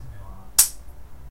Metal Contra Metal
clashing a ruler with a tree branch
keys, metal